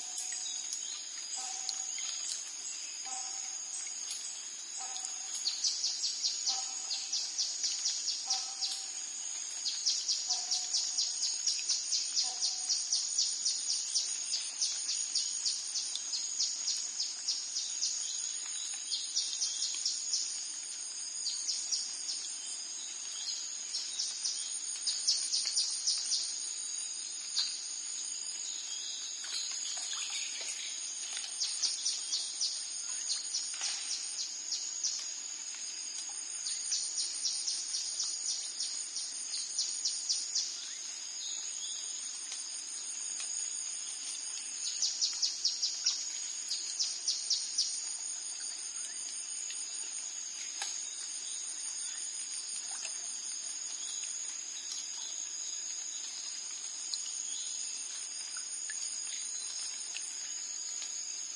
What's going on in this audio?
Thailand jungle morning crickets, birds echo +water drops on plants3 cleaned
Thailand jungle morning crickets, birds echo +water drops on plants cleaned
drops, water, jungle, field-recording, crickets, birds, morning, Thailand